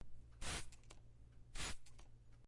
Spray Bottle
bottle, liquid, spray